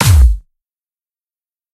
I made it on FL Studio 10. Nice power
kick, snare, ride